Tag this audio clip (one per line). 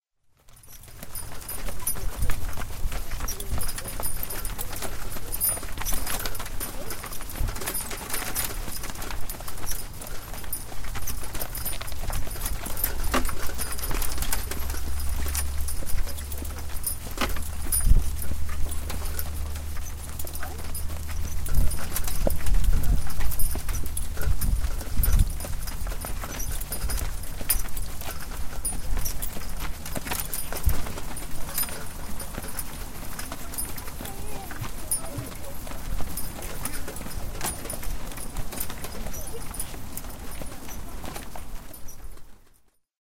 horse
wheels
carriage
gravel